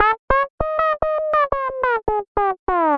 sonokids-omni 27
abstract, analog, analogue, arp-odissey, beep, bleep, cartoon, comedy, electro, electronic, filter, fun, funny, fx, game, happy-new-ears, lol, moog, ridicule, sonokids-omni, sound-effect, soundesign, speech, strange, synth, synthesizer, toy, weird